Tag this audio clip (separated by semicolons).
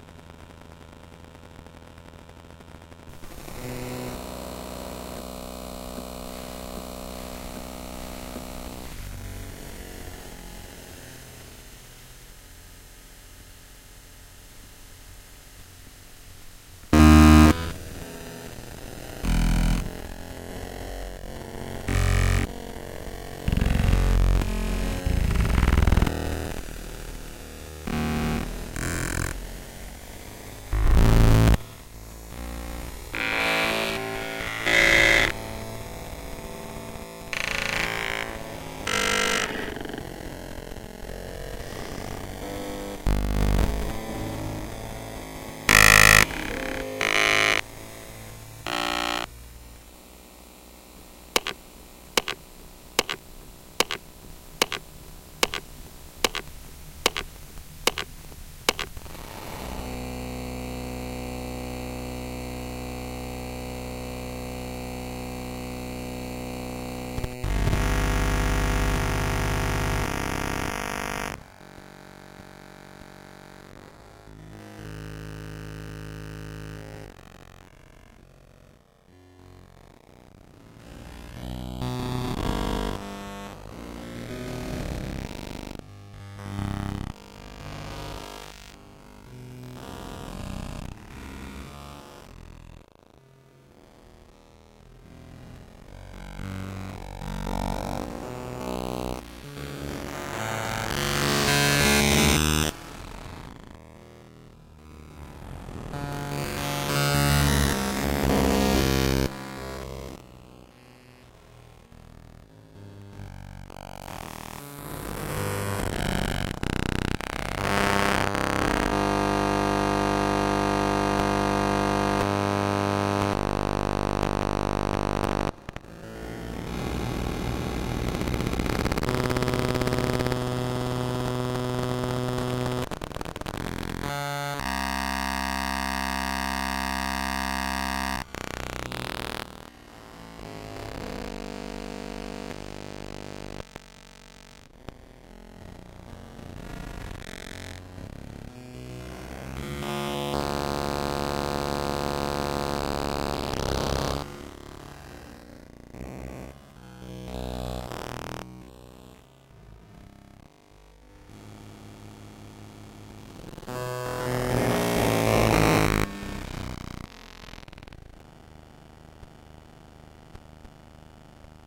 buffer; noise; sound; synth